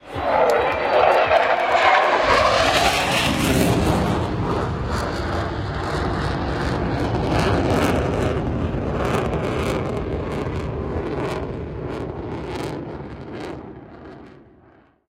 Fighter Jet 5
Celebrations took place in İzmit yesterday (on 25 June) on the 101st anniversary of its liberation during our war of independence against occupying forces. I recorded this fighter jet during its flight with TW Recorder on my iPhone SE 2nd Generation and then extracted some sections where not much except the plane itself was heard.
aeroplane, aircraft, airplane, F-16, F16, fighter, fighter-jet, flight, fly, flyby, flying, jet, military, plane, warplane